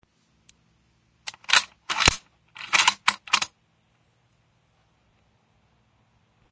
Mosin Nagant Bolt
This is the sound of an old bolt action Russian Rifle being cocked at a slow/moderate pace. Remember to be responsible and don't frighten anyone with this noise.
cocking-rifle, gun, bolt-action, Mosin, Russian-Rifle, cock, bolt, cocking, Nagant